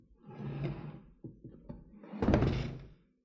Moving a wooden chair on a wooden floor.
{"fr":"Chaise en bois 1","desc":"Déplacement d'une chaise en bois sur du parquet.","tags":"chaise bois meuble bouger déplacer"}